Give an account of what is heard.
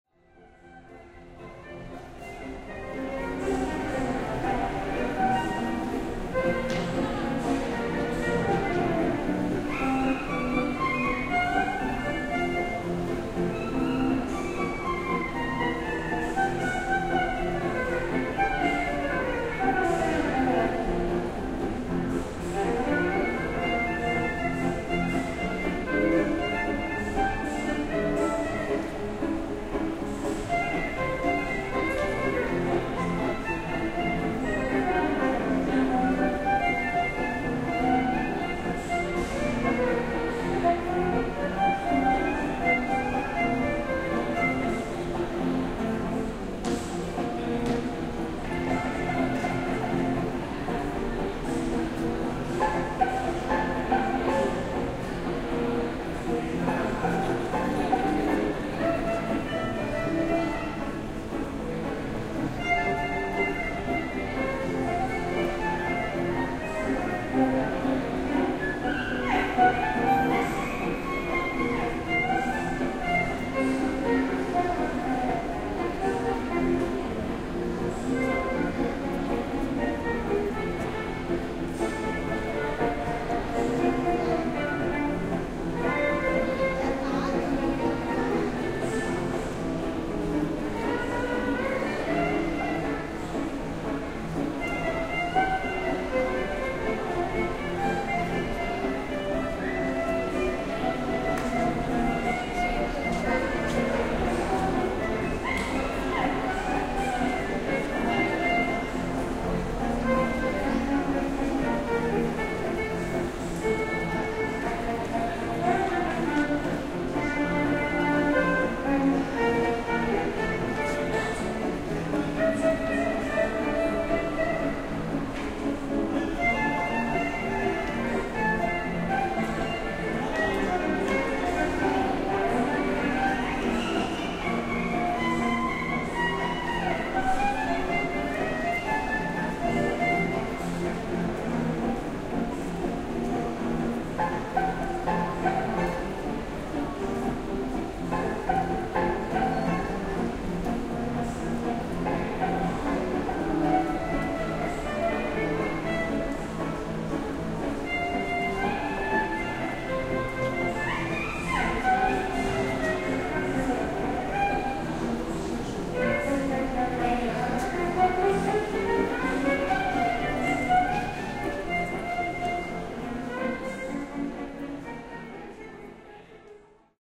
Ambience - Street musicians in underground hall, Madrid
Street musicians playing Gypsy jazz violin music in the hall of Sol station in Madrid underground.
field-recording, street-music